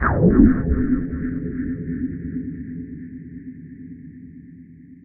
there is a long tune what i made it with absynth synthesiser and i cut it to detached sounds